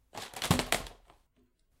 DVD on floor v3
Multiple DVD Shells dropped to floor / on the ground